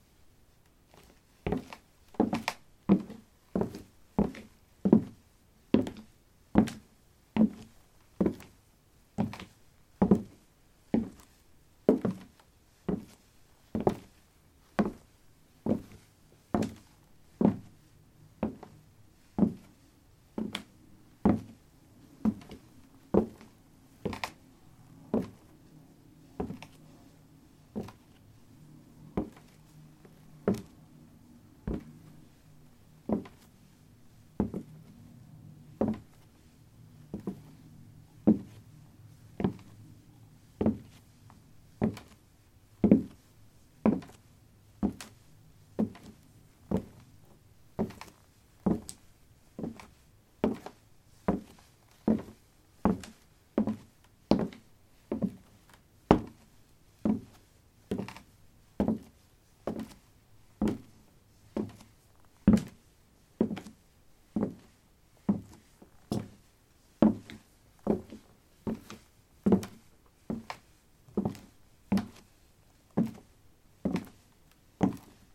wood 16a trekkingshoes walk
Walking on a wooden floor: trekking shoes. Recorded with a ZOOM H2 in a basement of a house: a large wooden table placed on a carpet over concrete. Normalized with Audacity.